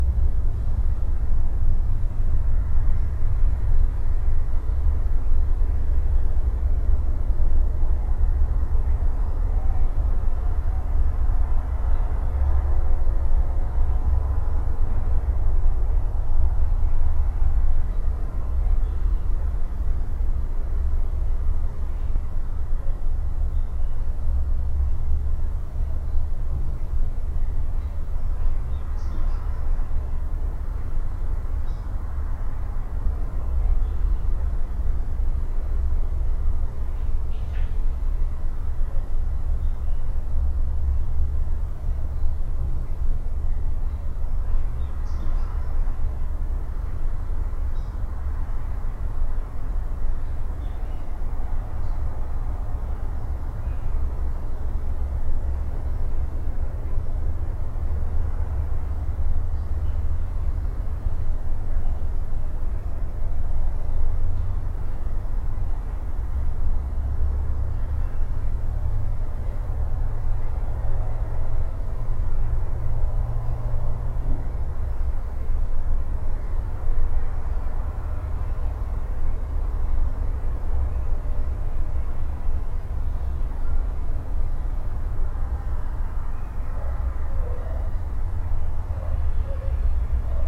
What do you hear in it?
Dark and noisy indoor ambience, normalized to -6 dB. Recorded using a Rode NTG2 shotgun microphone, placed three meters back from the window of a room of 5 x 5 x 3 meters. Sounds from cars and trucks running over a distant road can be heard in background.